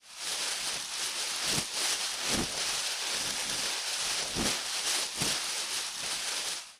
HOW I DID IT?
Sound recorded of plastic bag - With dynamical microphone
Using Audacity : Amplify: 6.0 dB
HOW CAN I DESCRIBE IT? (French)----------------------
◊ Typologie du son (selon Pierre Schaeffer) :
X (Continu complexe)
◊ Morphologie du son (selon Pierre Schaeffer) :
1- MASSE :
Groupe nodal.
2- TIMBRE HARMONIQUE :
Timbre terne et discret.
3- GRAIN :
Le son possède un grain léger et lisse.
4- ALLURE :
Aucun vibrato, aucun trémolo.
5- DYNAMIQUE :
Attaque abrupte non violente – Pas de relâchement.
6- PROFIL MELODIQUE :
Variations serpentines.
7- PROFIL DE MASSE :
Site : Une seule couche de piste de son en variations serpentines.
Calibre : RAS